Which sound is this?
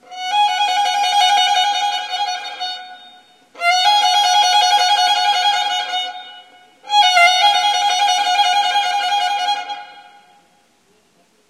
Baroque 02 - Trills
Baroque Phrases on Violin. Using Trills that Baroque Players would use to decorate in Baroque Music.
Baroque, Improvising, Ornamentation, Phrasing, Scales, Trills, Violin